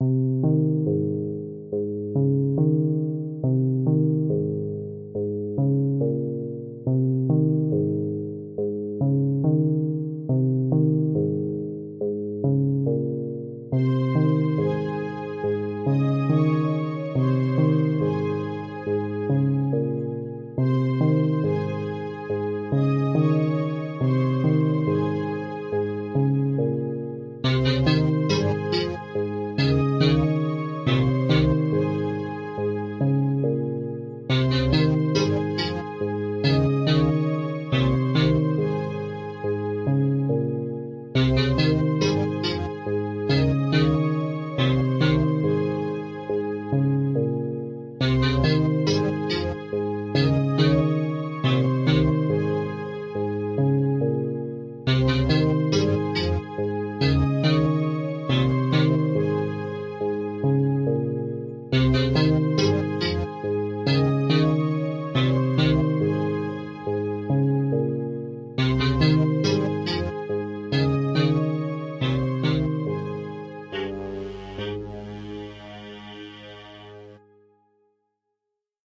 Village Theme 1
city, home, house, inn, music, peaceful, people, shelter, shop, town, video-game, village